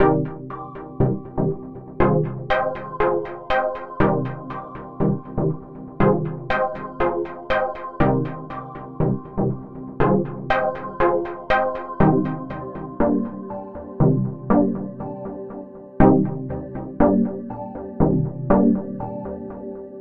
Easy techno 1
Simply techno synth, sounds loop, for your action game projects.